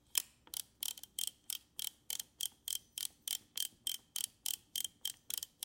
C220 camera winder
winding a camera
winder, film